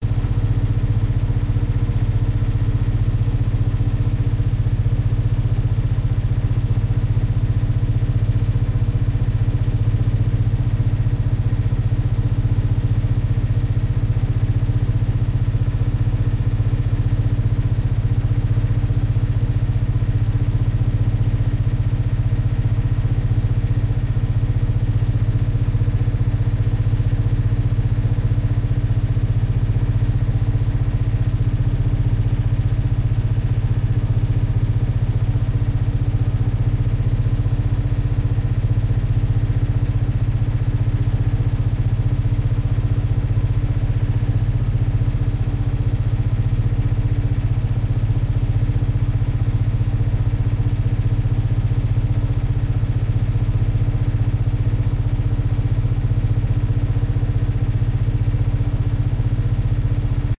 Yanmar Engine Slow
Yanmar boat engine sound during voyage near island Vis.
Boat, Cilinder, Diesel, Engine, Field-Recording, Machinery, Motor, Noise, Sailing, Ship, Two, Yanmar